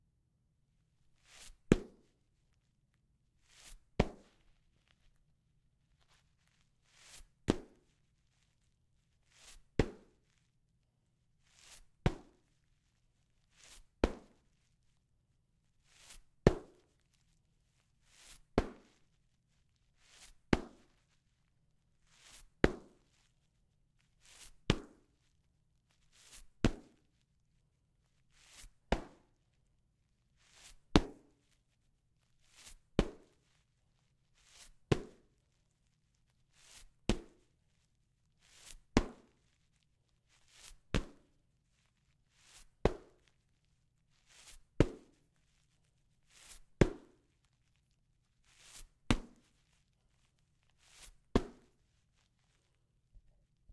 LANDING ON GRASS
This sound I record with Zoom H6. I recorded a landing on the grass